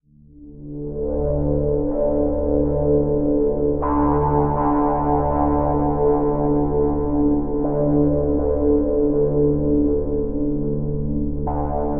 Layered pads for your sampler.Ambient, lounge, downbeat, electronica, chillout.Tempo aprox :90 bpm
synth, pad, electronica, texture, chillout, lounge, sampler, layered, downbeat, ambient